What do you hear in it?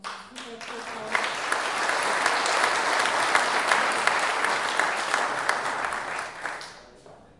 Medium Crowd Clapping 4
A medium sized crowd clapping for a speaker who just presented. Recorded on the Zoom H4n at a small distance from the crowd.
Location: TU Delft Sports & Culture Theater, Delft, The Netherlands
Check out the pack for similar applauding sounds.
audience, medium, crowd, group, applause, applaud, clap, clapping